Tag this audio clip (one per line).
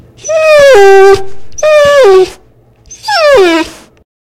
whining
animal
whine
dog